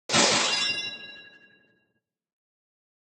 WEAPSwrd Sword Synth Shing WZK Synth
Sword Shing sound made by synth, can be used as magic spell as well.